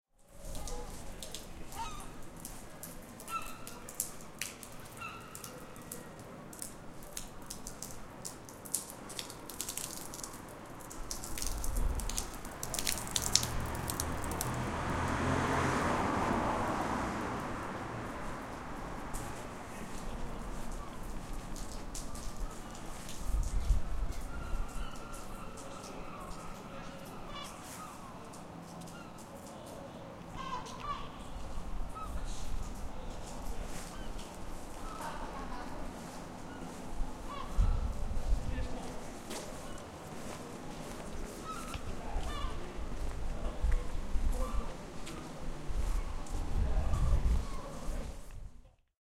Inverness After Hours
Recorded in Inverness Scotland
ambiance, field-recording, ambient, soundscape